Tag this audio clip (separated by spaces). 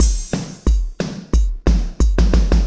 bpm drum 90 beat loop 180